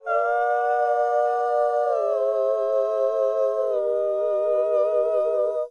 another cheeky little ooh